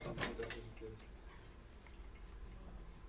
sonido maquina
machine, bar, coffe, sound